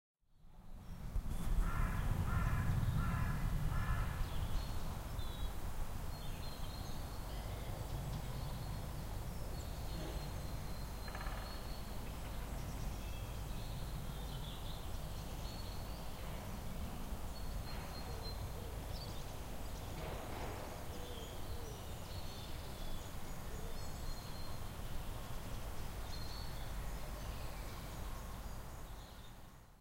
Sk310308 woodpecker
The sounds of a spring day at Skipwith Common, Yorkshire, England. At 10 seconds in there is the sound of a Great Spotted Woodpecker hammering in the distance.
speech, atmosphere, bird-song, field-recording, ambience, bird, woodpecker